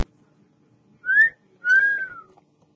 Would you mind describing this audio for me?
Just my sister, Brianna, doing a whistle.
two, whistle, whistles